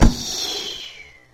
arrow-shot
This sound is a simulation.